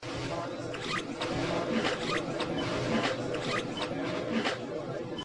sound-design created by processing a field-recording from a grocery store here in Halifax; processed with Adobe Audition